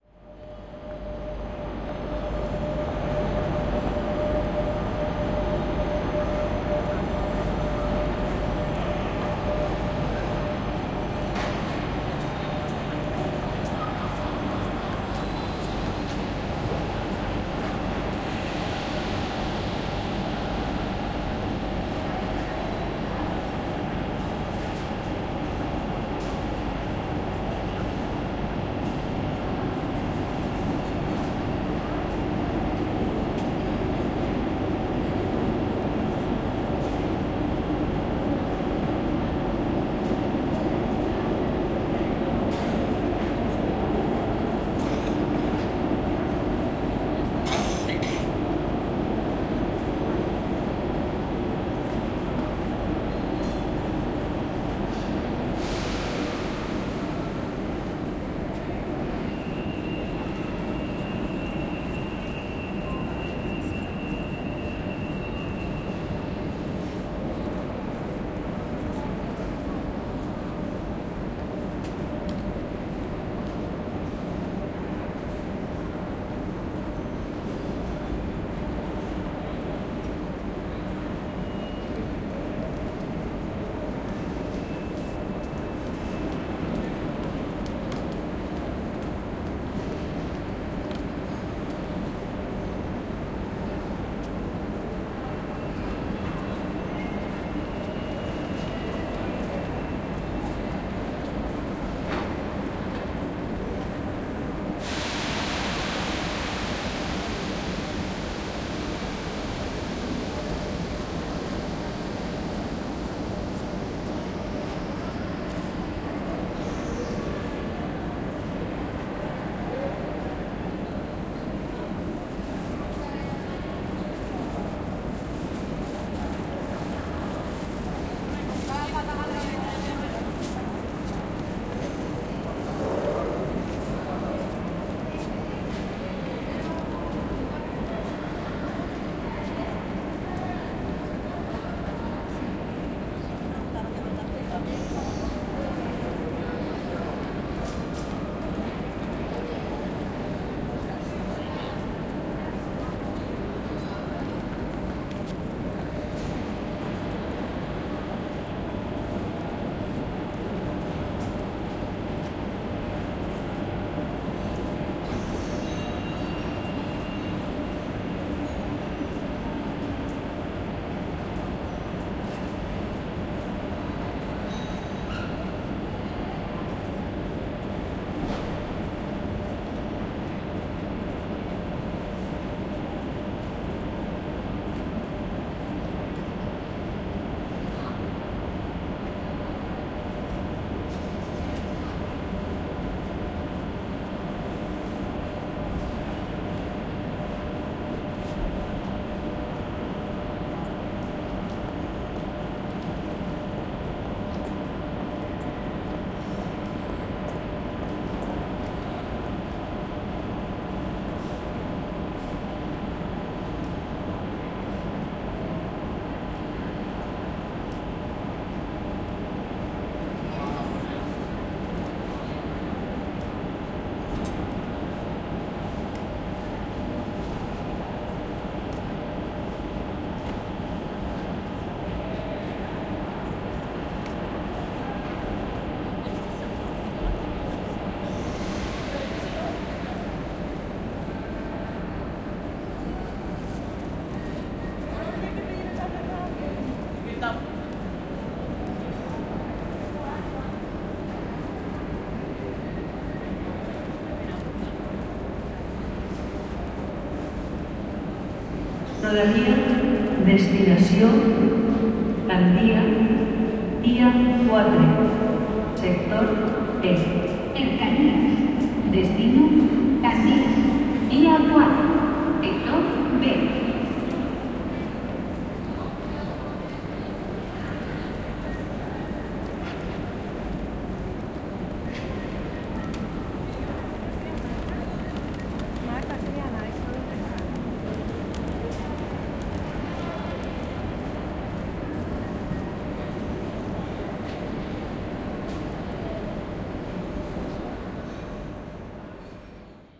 Cafe at Train Station
People in the station drink in a bar
bar, coffee, Pleople